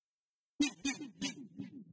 minimal-sound+delay
Minimal sound with delay added.